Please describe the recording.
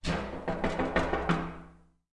Glass Window Knocking 1
In a basement I recorded noises made with a big glass plate. Rattling, shaking, scraping on the floor, etc. Recorded in stereo with Rode NT4 in Zoom H4 Handy Recorder.
pane, plate, knocking, panel, window, room, glass